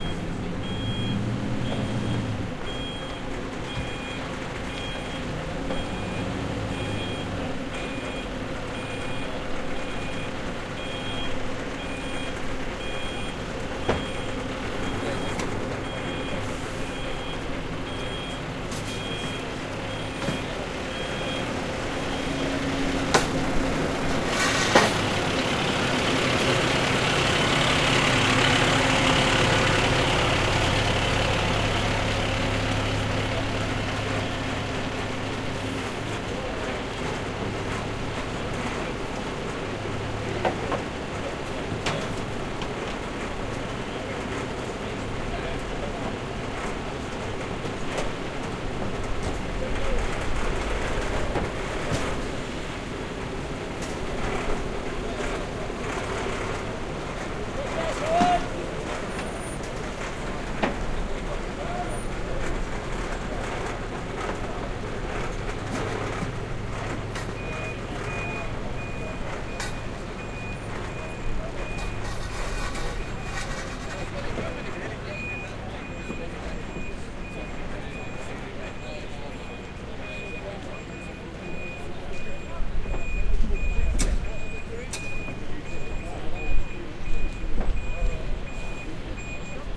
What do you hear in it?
reversing moving trucks
Trucks moving back and forth during a training exercise. Could be used for a construction site or an emergency report. Some radios can be heard in the background.
field-recording, pan, moving, binaural, reverse, truck